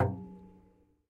Kicking a wheelbarrow softly, creating slight resonance.
hit, softly, metal, kick